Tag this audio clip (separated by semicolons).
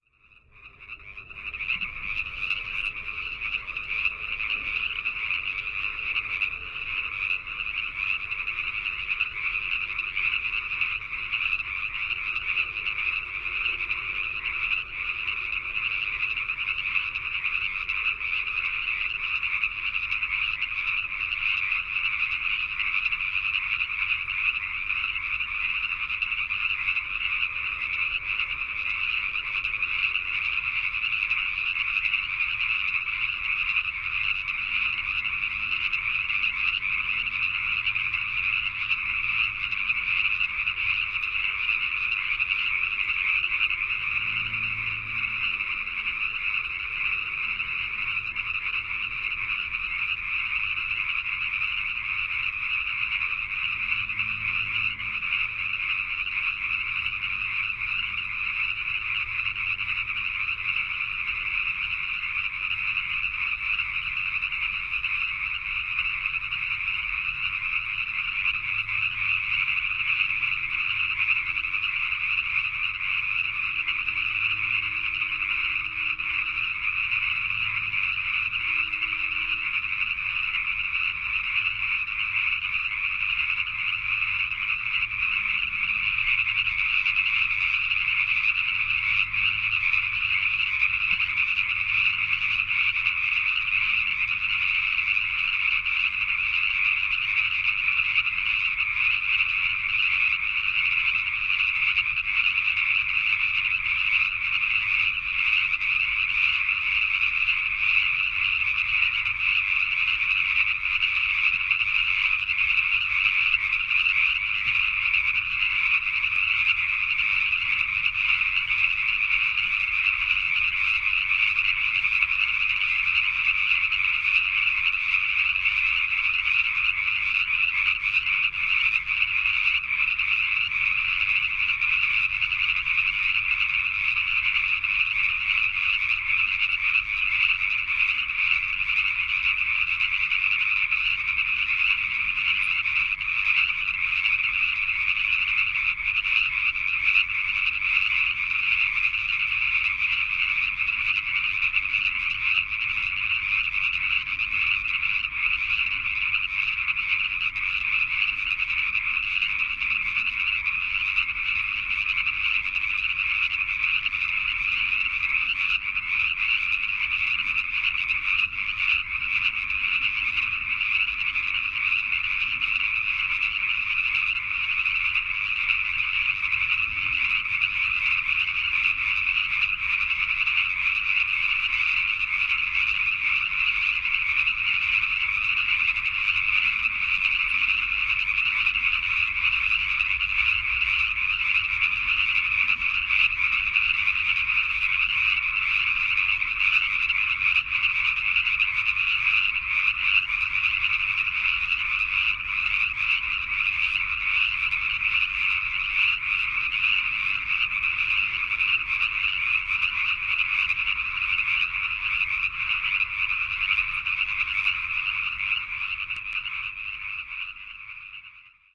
california; frogs